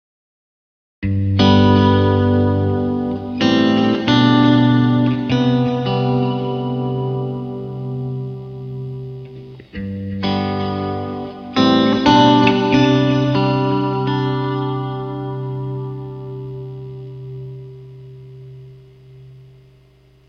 chordal meandering 1
some chords with an impulse response from a pcm 70 reverb
chords, guitar, ambient